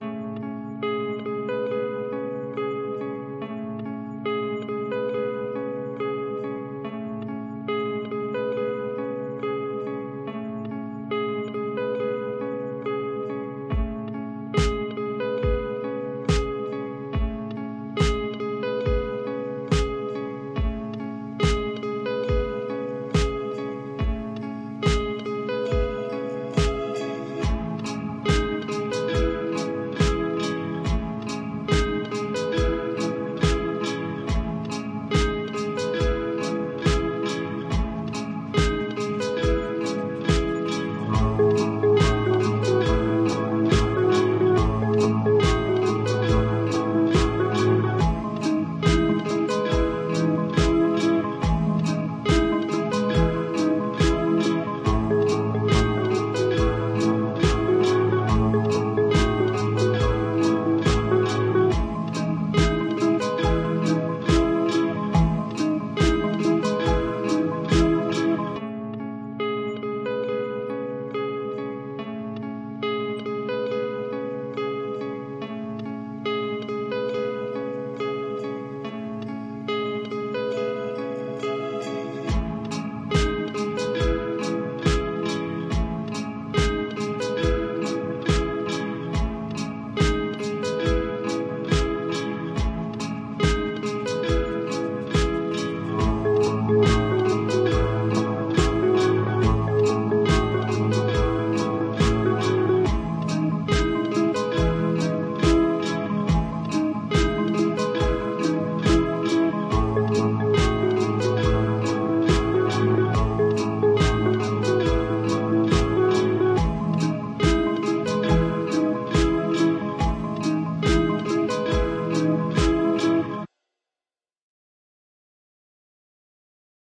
Warm Guitar Song
A song I made with my Stratocaster guitar and computer. It's a little wonky, but the essence is there.